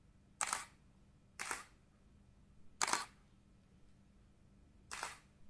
photo-flash-canon5D
Multi times clicked a photo with flash, both near and far.
5D, camera, canon, click, dslr, flash, mirror, photo, trigger